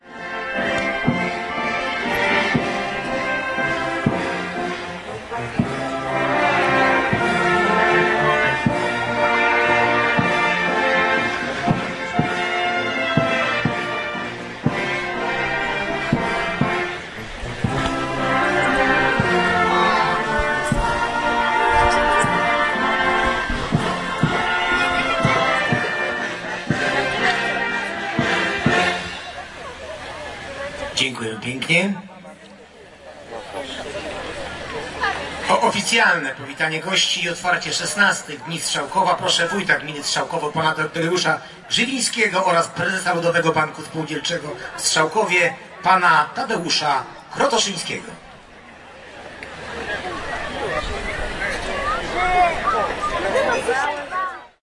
27.06.2010: the Day of Strzalkowo village (village in Wielkopolska region in Poland). I was there because I conduct the ethnographic-journalist research about cultural activity for Ministerstwo Kultury i Dziedzictwa Narodowego (Polish Ministry of Culture and National Heritage). the Day of Strzalkowo is an annual fair but this year it was connected with two anniversaries (anniv. of local collective bank and local self-government).
the national anthem sound.